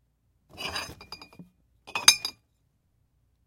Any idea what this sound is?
Box Of Bottles Take Out FF250
A quick box slide, low scraping noise (wood), followed by glass bottles shaking and tinging with the movement. 1 high pitched ting, glass-on-glass.